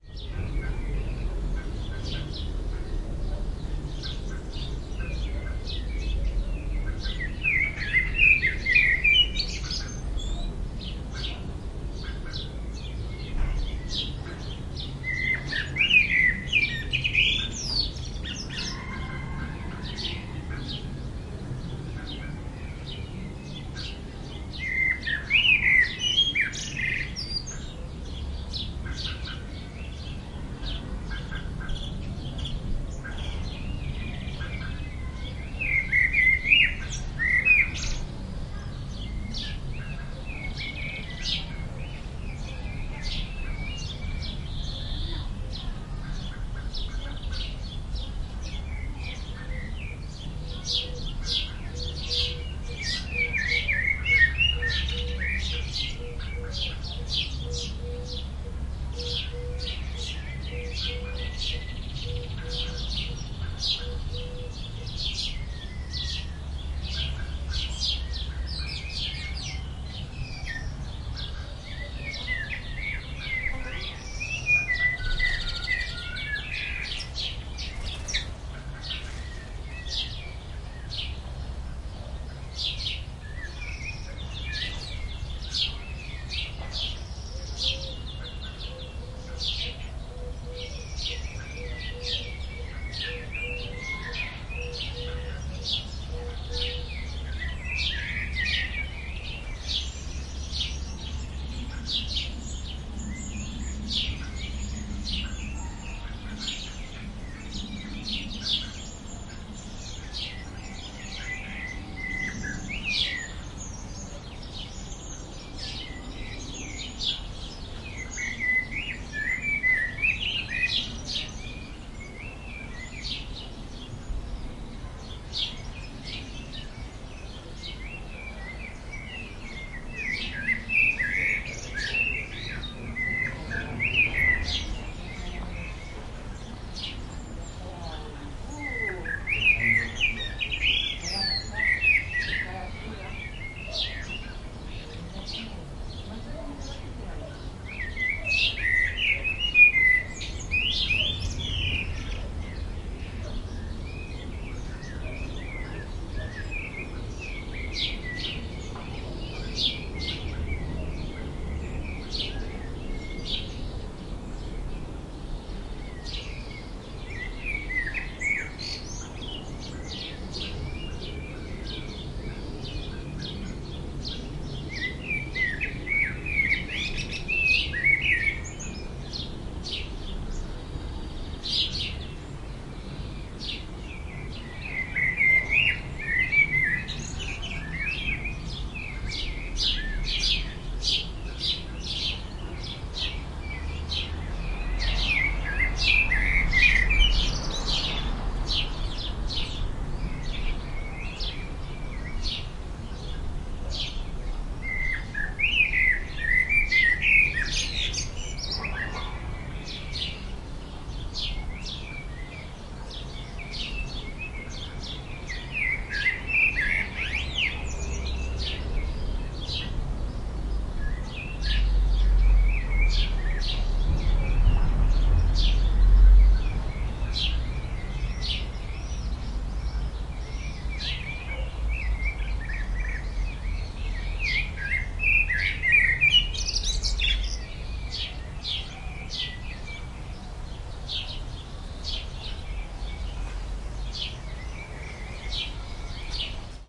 Midday ambiance in a residential development
Spring midday ambiance in garden in a residential development.
Recorded with Sound Devices 722 recorder and Soundfield ST250 in Blumleim (stereo)
Birds, Day, Field-recording, garden, Madrid, Midday, Park, SoundField, Spring, ST250